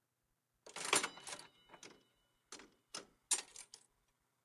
Recordings of arcade games and atmos from Brighton seafront